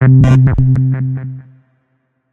Robot eating screws. Playing around with filters.
sci-fi, experimental, eating, nomnom, robot, yummy